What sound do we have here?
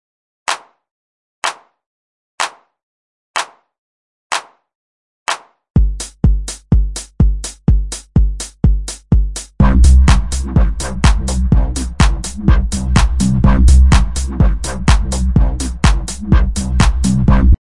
This song can be used for a dance scene, or an intro scene, or anything you deem credible.